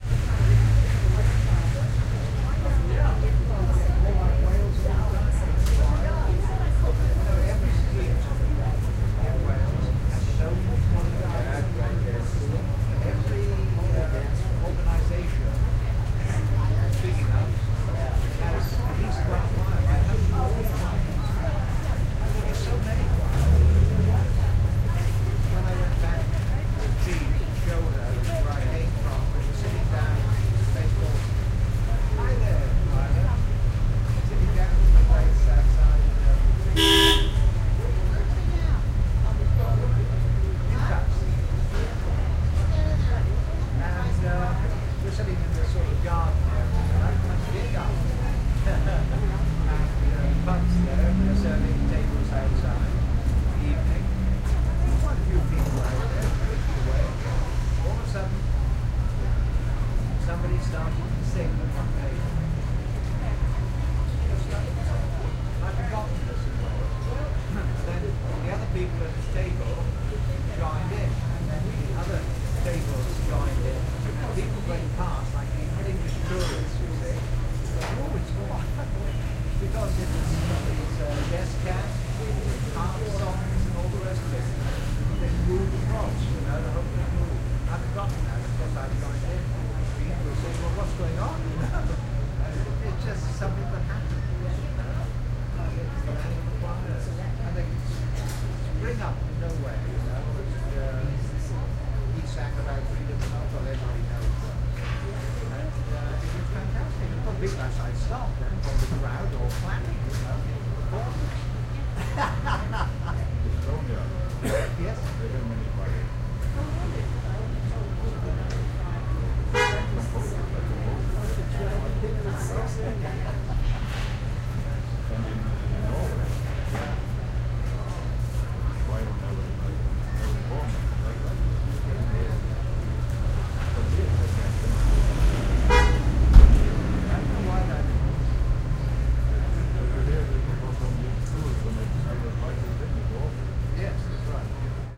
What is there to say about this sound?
Puerto Vallarta4 part1
First half of a binaural field recording of street sounds outside an internet/coffee shop in old town Puerto Vallarta,Mexico. Location is at the intersection of Olas Altas and Basillo Badillo streets. Recorded on a Sony minidisc MZ-N707. Microphone is homemade consisting of Panasonic capsules mounted into headphones.
binaural, city, field-recording, street